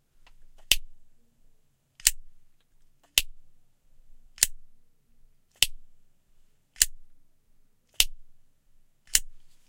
noise of a cigarette lighter, recorded using Audiotechnica BP4025, Shure FP24 preamp, PCM-M10 recorder
cigarette, clipper, collection, gas, ignition, lighter, smoking, spark